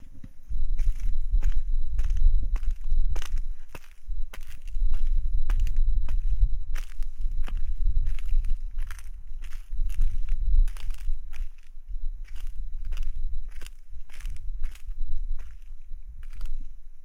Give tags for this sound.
steps
walking
footsteps

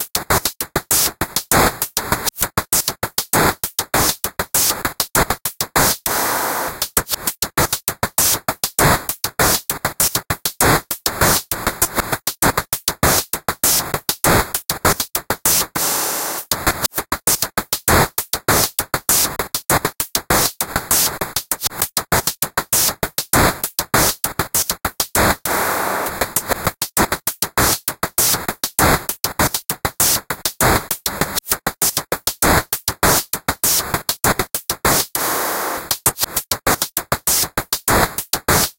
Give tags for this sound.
120BPM
ConstructionKit
dance
electro
electronic
hihats
loop
rhythmic

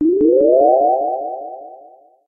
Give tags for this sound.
laser
magic
science-fiction
sci-fi
SF
warp

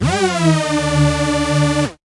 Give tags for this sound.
Bass
Electronic
Noise

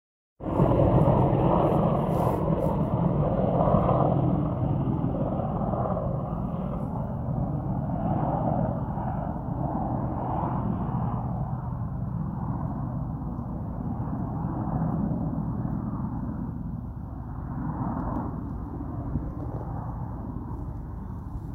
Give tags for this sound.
aircraft
Air
flyi
helicopter
flight
chopper

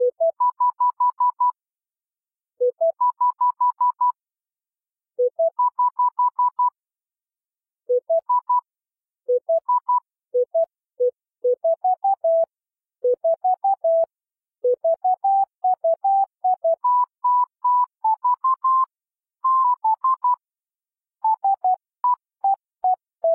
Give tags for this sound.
alarm
bellaciao
clock
nokia
phone
ring
ringtone

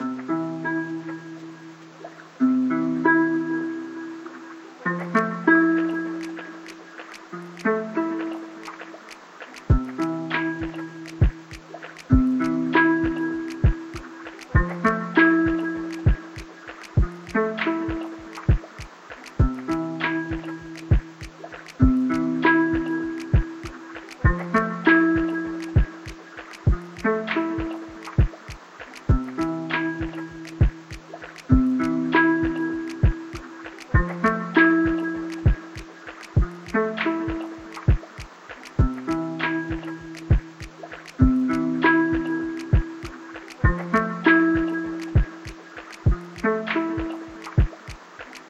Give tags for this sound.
hip
loop
guitar
ukulele
soft
hop
beat
romantic
drum
lofi
relaxing
simple

